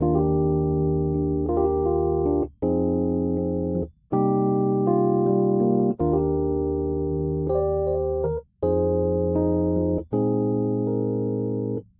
Cool UpBeat Hip Hop Piano - 80bpm - Ebmaj
cool, lo-fi, smooth, lupe, piano, hip-hop, hiphop, keys, jcole, lofi, loop, upbeat